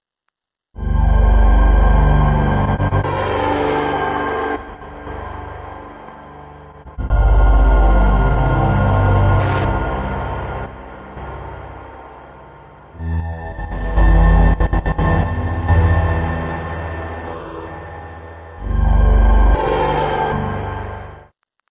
evolving synth
electro, electronic, glitch, processed, synth, synthetizer, techno